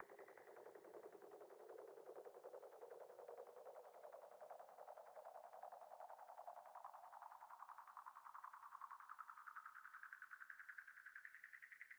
Nice sound i created using filter on an electronic bass sound